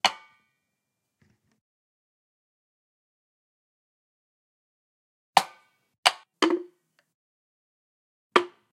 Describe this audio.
Wooden-Barrel One-Hit 5
Striking a wooden barrel with a thick wooden drum stick I have for large percussion.
Minimal EQ to remove useless frequencies below 80 hz, no boosting more than 2db, so very non destructive.
No compression added
No permissions needed but I'd love to hear what you used it in!
Transient, Wood, Stick, Hit, Wooden, Drum, Hollow, Field-Recording, Barrel, Wooden-Stick, Drums, Percussion